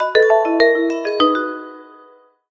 A 2.5 second ringtone created in GarageBand.